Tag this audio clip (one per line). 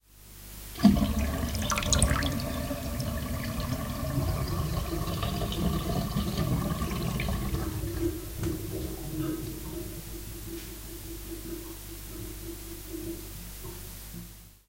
emptying
kitchen
metal
plug
pulling
sink
water